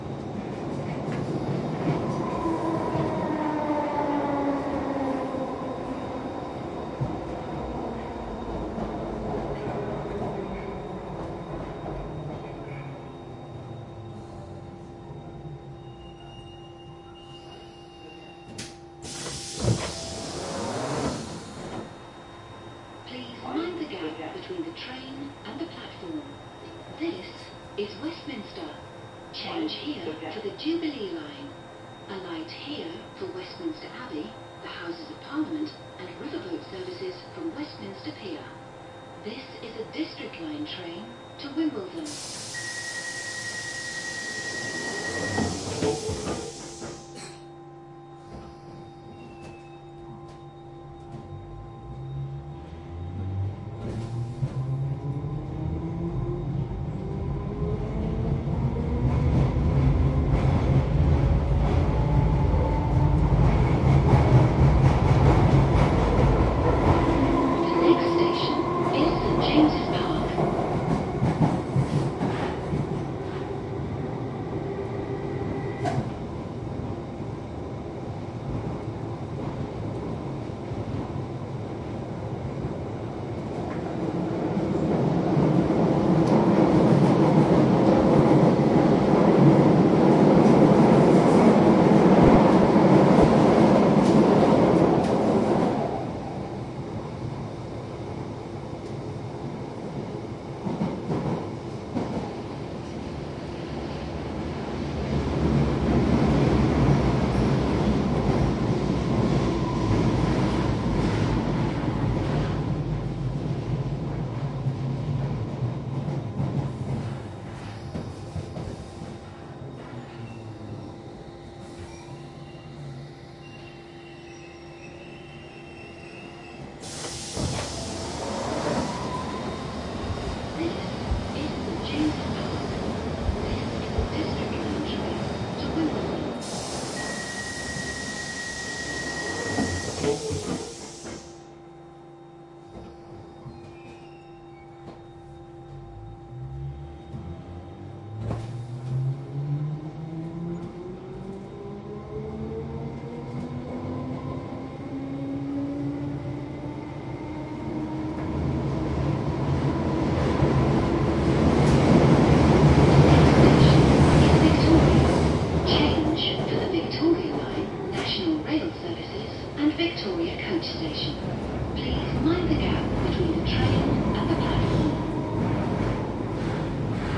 140802 London TubeWestminsterVictoriaIn F
4ch surround recording of the interior of an empty London Underground District Line train to Wimbledon. Recording was conducted between the stops Westminster and Victoria. The recording features train action, doors opening and closing, announcements and (virtually) no passenger noises. Perfect as a backdrop.
Recording was conducted with a Zoom H2, these are the FRONT channels of a 4ch surround recording, mics set to 90° dispersion.
announcement, beep, city, clank, clatter, door, field-recording, hiss, hydraulic, London, mechanic, metro, rail, railway, scrape, station, subway, surround, train, transport, tube, underground, urban